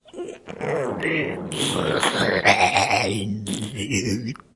Zombie drooling
Inhuman creature zombie-like gasps. Zombie voices acted and recorded by me. Using Yamaha pocketrak W24.